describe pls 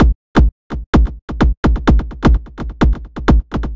Experimental Kick Loops (21)
A collection of low end bass kick loops perfect for techno,experimental and rhythmic electronic music. Loop audio files.